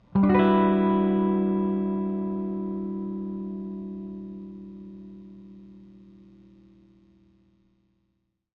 guitar chord 05
A chord played on a Squire Jaguar guitar. I'm not good at guitar so I forget what chord.
chord, electric, guitar, jaguar, sample, squire, strum